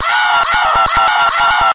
a processed scream from fruity loops.
break, vocal, panda, processed, scream, glitchy